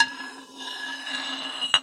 recordings from my garage.